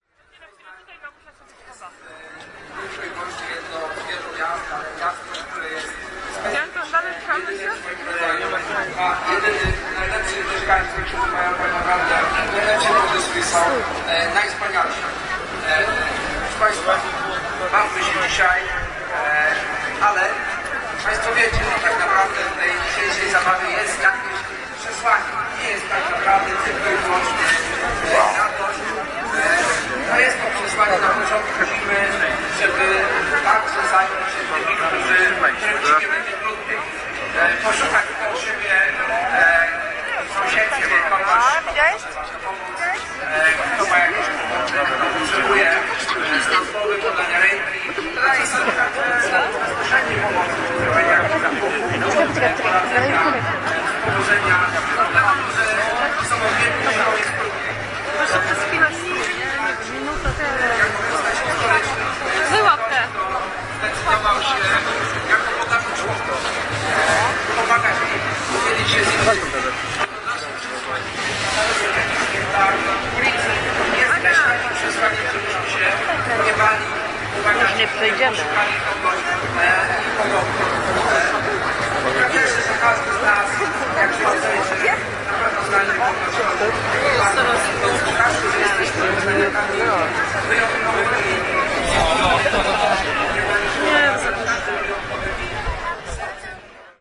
11.11.09: between 14.00 and 15.00. the ceremonial annual parade on the street Św/Saint Marcin day name is ending; the short speech
people, poznan, voices, street, poland, crowd, saint-marcin, field-recording, bass-drum, parade, national-holiday, street-name-day